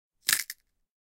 Audio of stale breadstick being chewed with an open mouth at about 5cm from the recorder. I recorded this for a screen scoring and sound design recreation task for the 2016 short film "Dust Buddies", the result can be seen here.
An example of how you might credit is by putting this in the description/credits:
The sound was recorded using a "Zoom H6 (XY) recorder" on 10th April 2018.